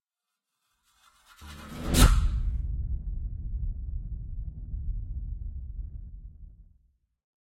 Trailer hit 2
Industrial Sounds M/S Recording --> The recorded audio is processed in logic by using different FX like (reverse/reverb/delay/all kinds of phasing stuff)
Enjoy!
woosh, design, fx, boom, film, effect, cinema, garage, sound, effects, hits